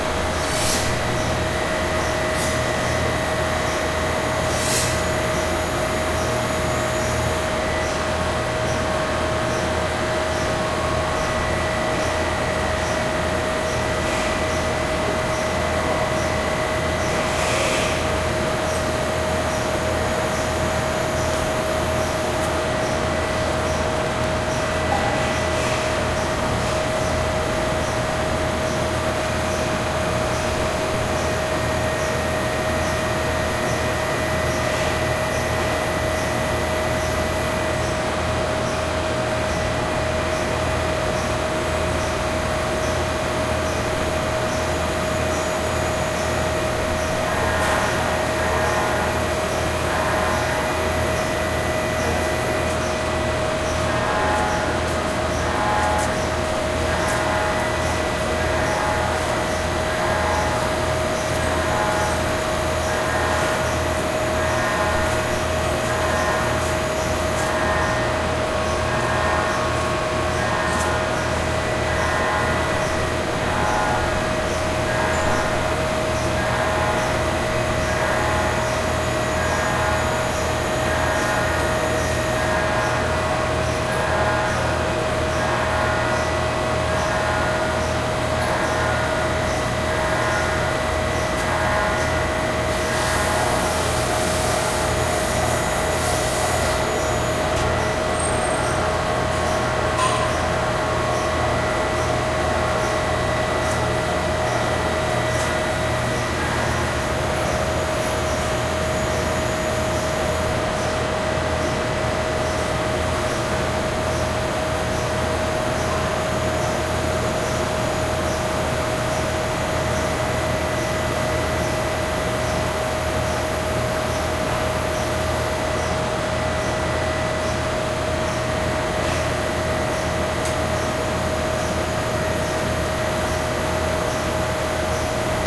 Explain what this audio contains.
General Fusion industrial workshop factory ambience9 heavy machine hum, bg activity, distant warning alarm
alarm, noise, workshop, factory, industrial